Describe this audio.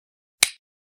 Different Click sounds
Click, lego, stone